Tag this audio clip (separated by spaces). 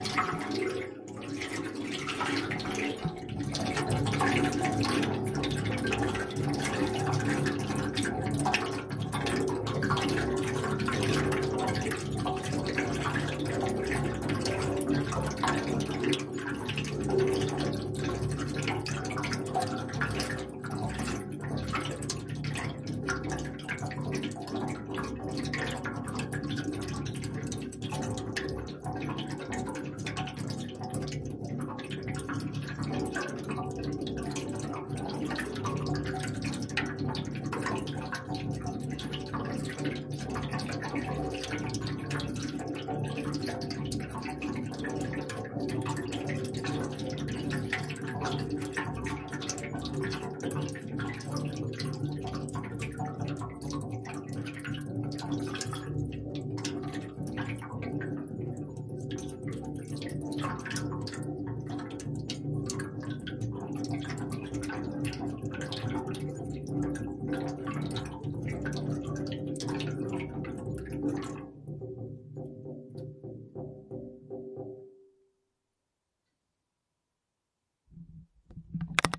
ambient bath filling resonant water